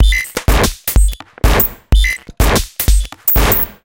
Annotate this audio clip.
AbstractBeatsFull 125bpm02 LoopCache AbstractPercussion
Abstract Percussion Loop made from field recorded found sounds